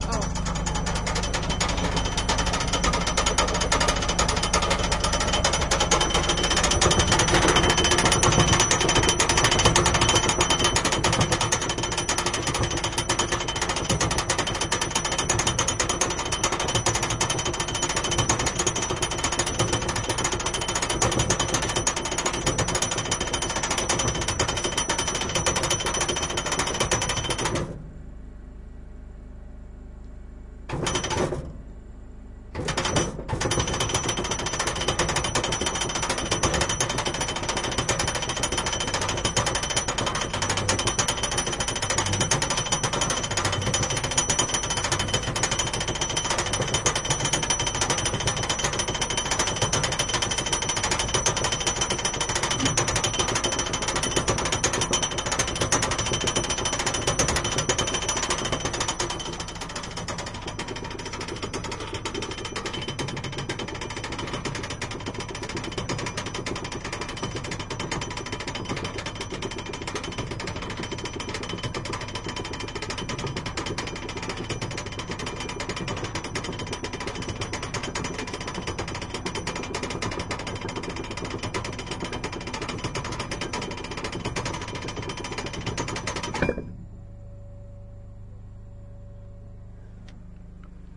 DR05 0205 sandau fähre
recorded with a tascam dr-05 at a yaw-ferry witch brings you over the "elbe" (a german river).
ferry, field-recording, metalic, reaction-ferry, yaw